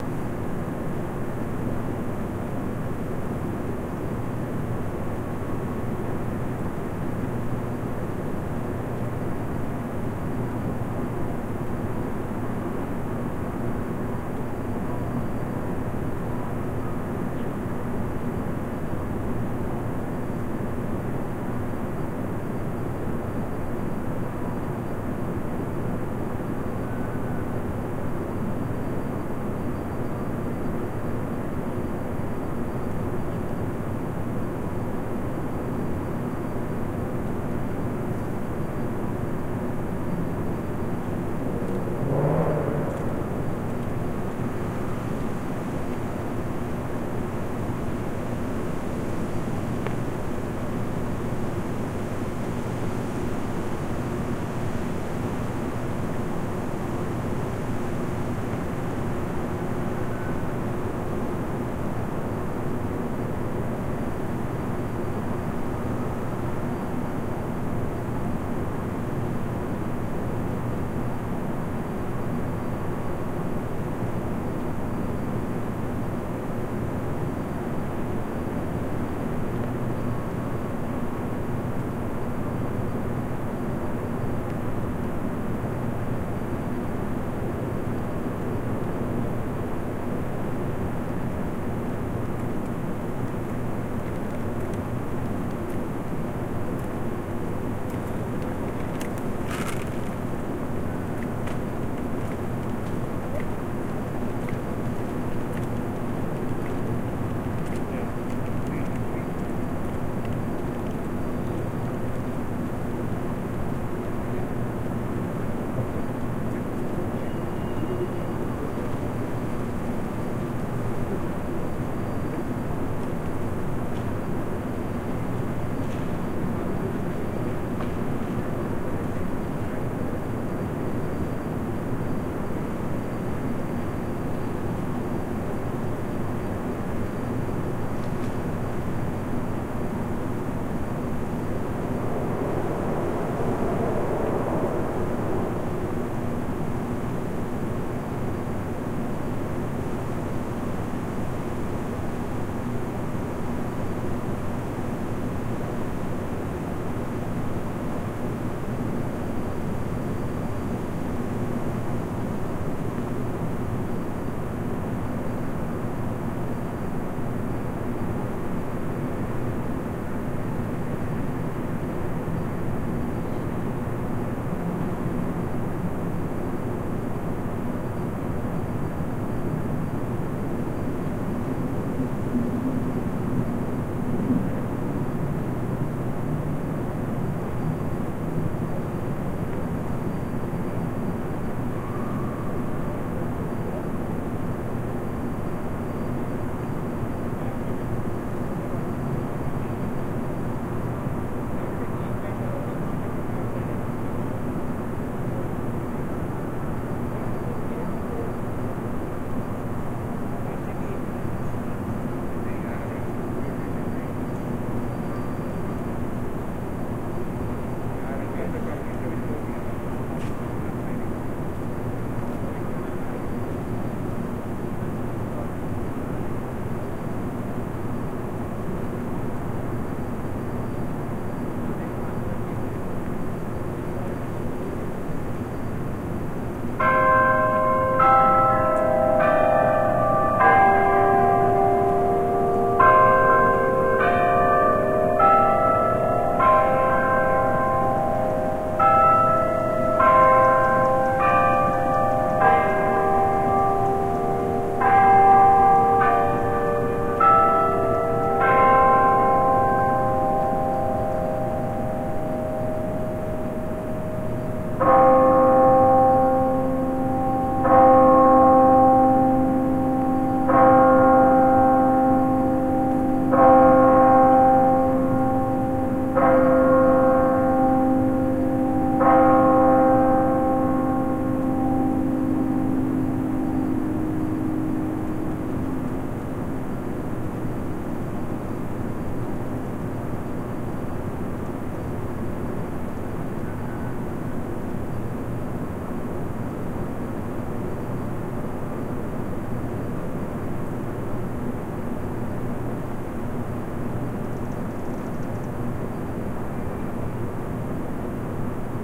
Ambience and Hourly Bell Chimes - UA
-University of Arizona main strip ambience, plus hourly bell chime at 6PM near end of audio recording.